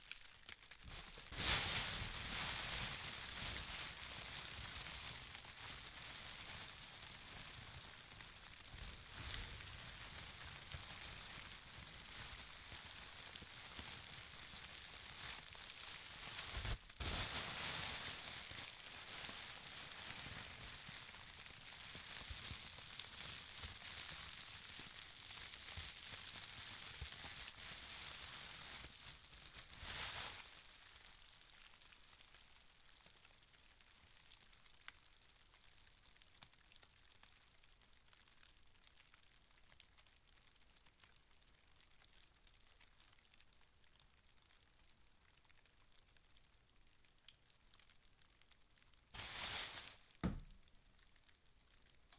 Fire Two
Fire sounds including sounds of roaring flame and crackling. Recorded on a Rode mic and Zoom H4N Pro.
crackling, burning, flame, burn, crackle, fire, flames, fireplace